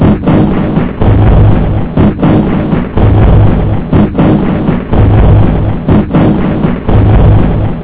Bruem sink3(rect)
break, deconstruction, effects, glitch, wobbly